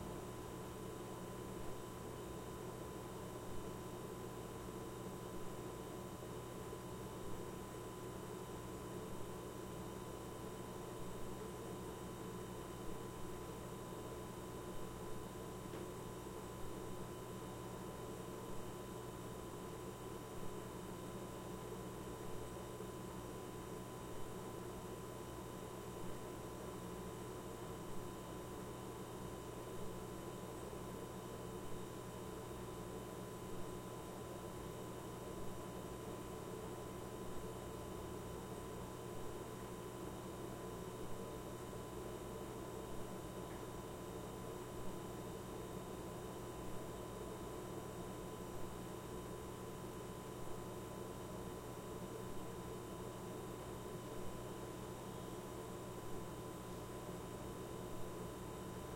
Kitchen Ambience
I set my Zoom H4n on my kitchen counter, turned the record volume up to 100%, and walked out of the room. There's slight noise from the window and my refrigerator.
natural-sound, samson, zoom, h4n, kitchen, natural, ambience